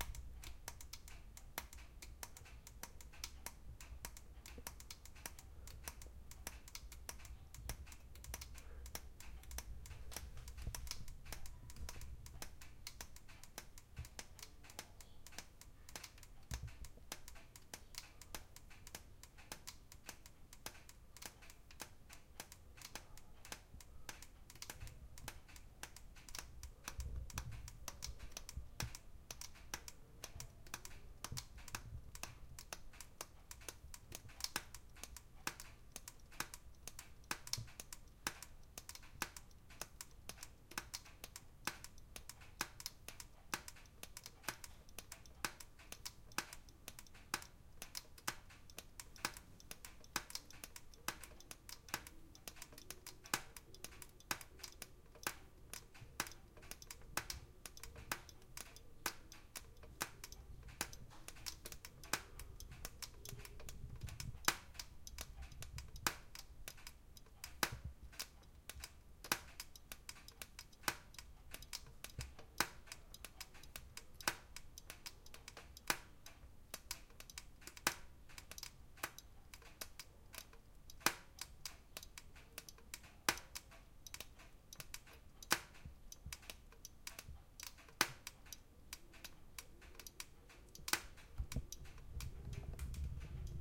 eHerd + Winkekatze
field-recording relaxing electric oven and Maneki Neko beat rhythmic
Neko, field-recording, electric, beat, oven, rhythm, rhythmic, Winkekatze, Maneki